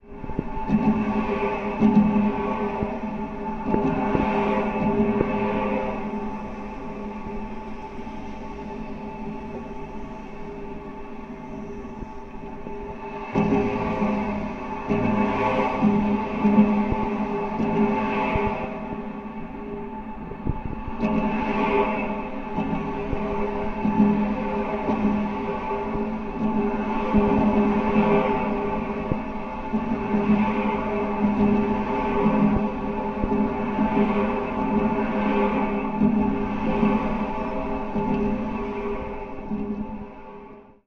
Contact Mic SDR Bridge Traffic Barrier 04
This is a collection of sounds gathered from the SDR Bridge in Newport, UK.
I had the chance to borrow a contact microphone from a very generous and helpful friend of mine.
Having not used one before, I could not believe the amount of micro sounds it picked up from my finger movements. Movements I couldn't even feel myself make. So I apologise in advance for the light tap every now and again. Still quite interesting to listen to and know where to scout for next time.